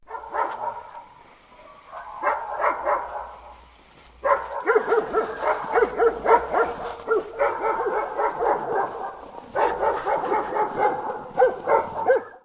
Dogs barking 1
angry, animal, bark, barking, dark, dog, dogs, growl, growling, hound, labrador, mongrel, night, pet, pitbull, rottweiler, terrier